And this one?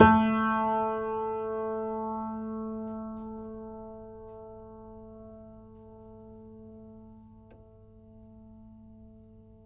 My childhood piano, an old German upright. Recorded using a Studio Projects B3 condenser mic through a Presonus TubePre into an Akai MPC1000. Mic'd from the top with the lid up, closer to the bass end. The piano is old and slightly out of tune, with a crack in the soundboard. The only processing was with AnalogX AutoTune to tune the samples, which did a very good job. Sampled 3 notes per octave so each sample only needs to be tuned + or - a semitone to span the whole range.
It is a dark and moody sounding, a lot of character but in now way "pristine".
german, multi, old, piano